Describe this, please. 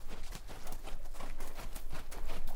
Horse Walking By In Dirt 02
I recorded a horse trotting by. Ground is dirt/sand.
Dirt, trot